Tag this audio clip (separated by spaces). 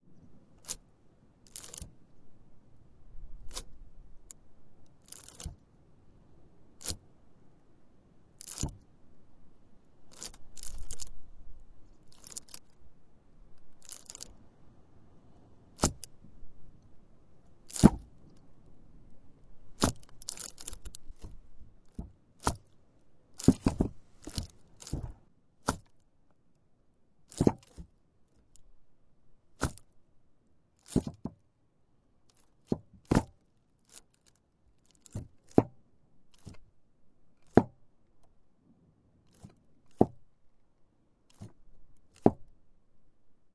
stone rock seaweed field-recording